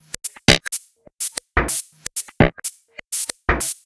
ReversePercGroove 125bpm02 LoopCache AbstractPercussion
Abstract Percussion Loop made from field recorded found sounds
Abstract, Loop, Percussion